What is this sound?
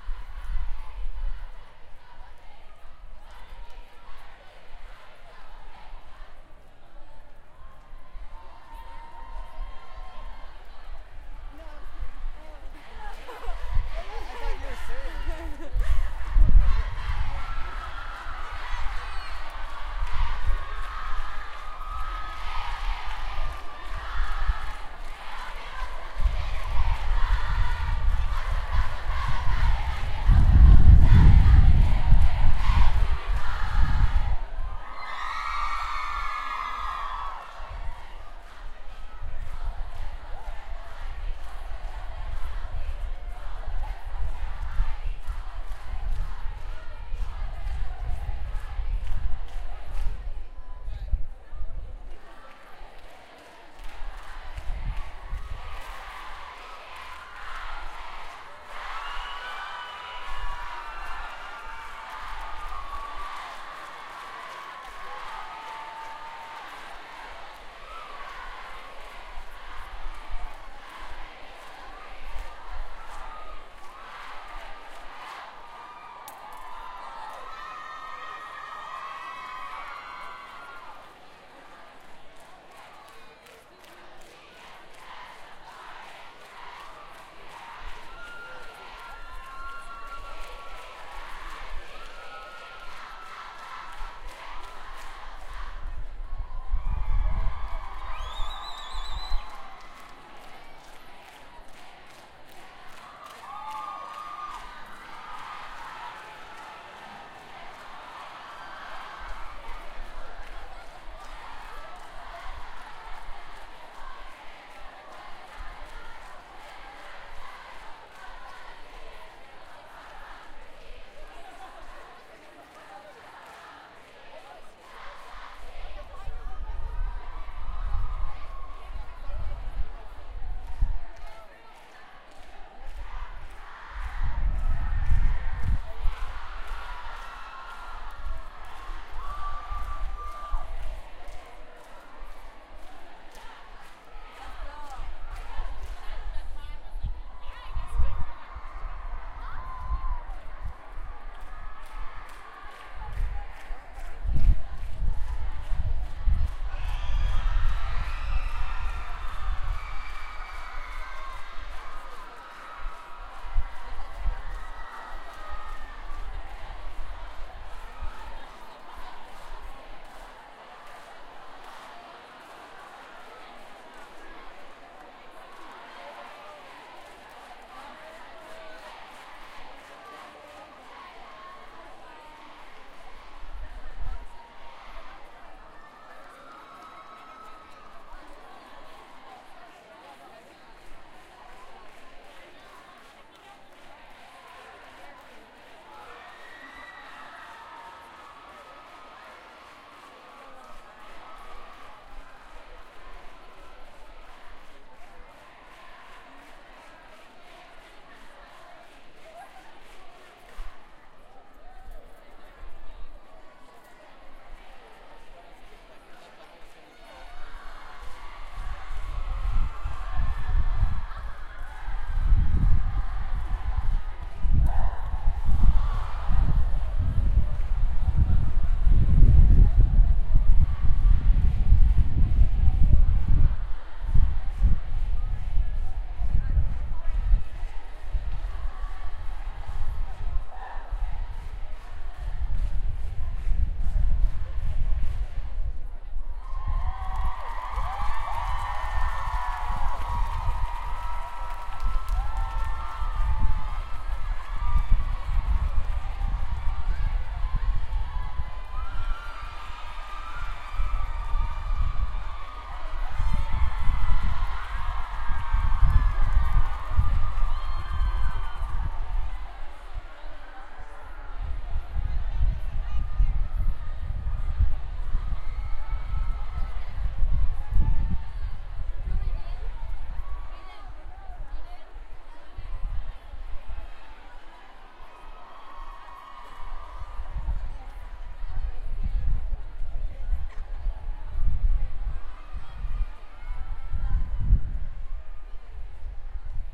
Chanting sorority
This is field recording I took spontaneously as I walked by a large-scale sorority ritual. Some wind noise.
clapping, singing, Chanting, sorority, crowd